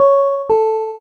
This is a chime sound generated with the aid of Audacity, by merging simple waveforms together and enveloping the result. It can be used as a electronic doorbell or as an elevator chime.
generated, sound, effect, chime